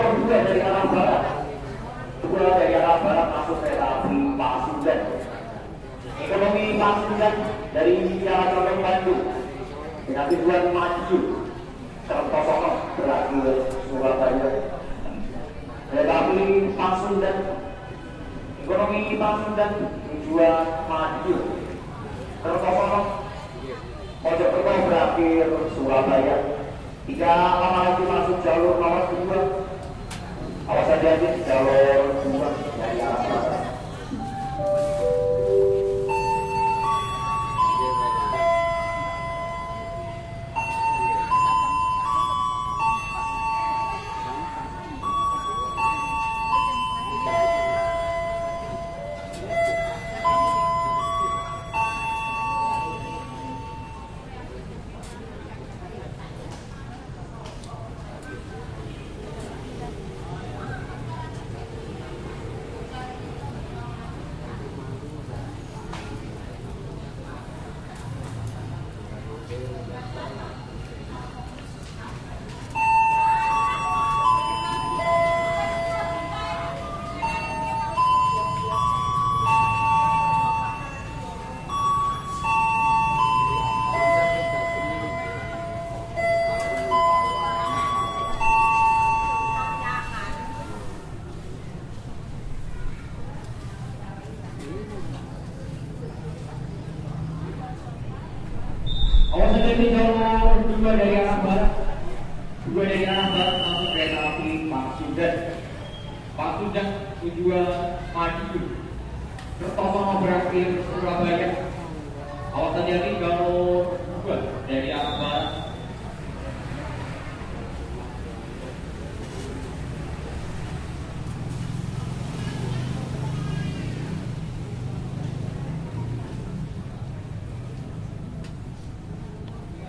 Lempuyangan Train Station, Jogjakarta. Announcement of a train about to arrive. Recorded with a Zoom H4N.
jogjakarta, train-station, indonesia, lempuyangan